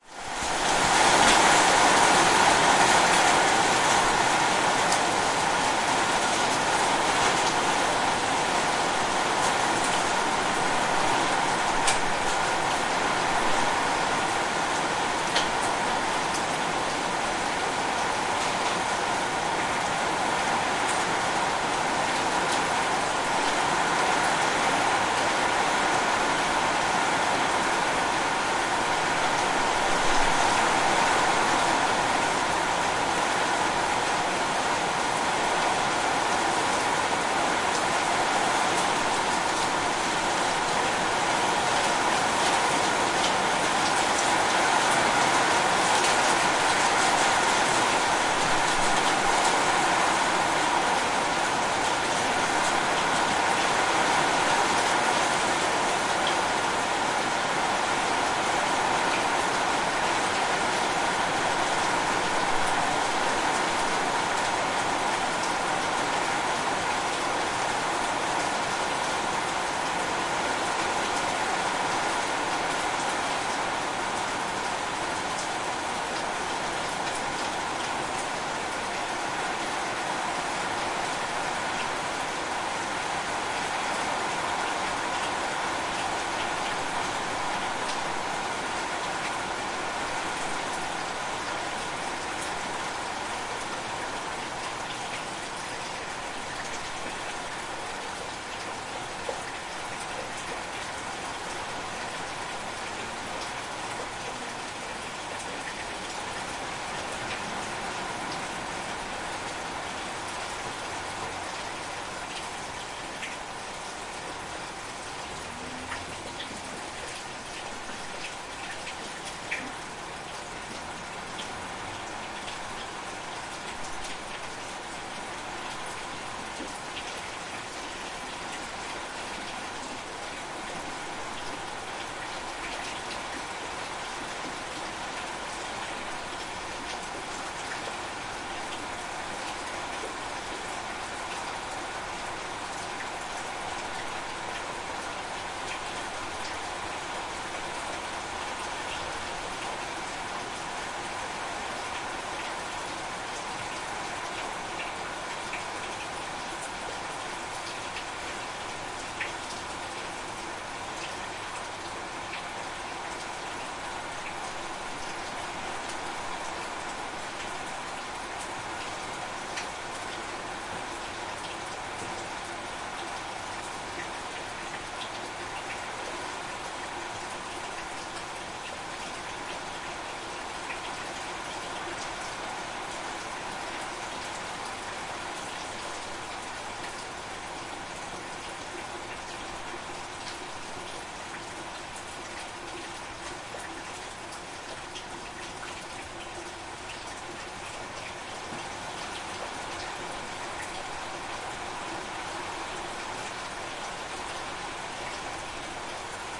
Rain - heavy getting lighter
Heavy rain on roofs with some hail at the start getting lighter over the recording, with some drain sounds becoming more prominent towards the end. Recorded with a Roland R-26, XY mic, mild EQ and volume balance in Pro Tools